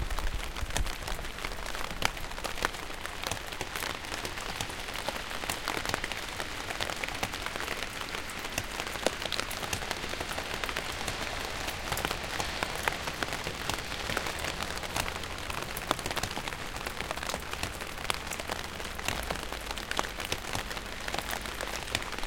Rain on plasric
Sound of a rain on an umbrella.
crimps, drops, light, rain, umbrella